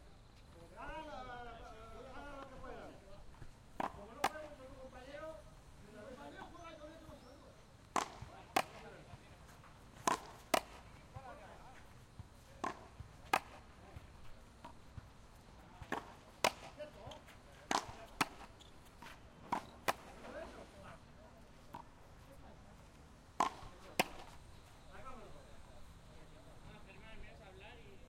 A part of a match of Frontenis where are four guys playing. The sound is a mix of the voices of the players and the ball bouncing against the ground and the wall of the court. They are in Parc del Clot in Barcelona.
ball, barcelona, frontenis, park, sport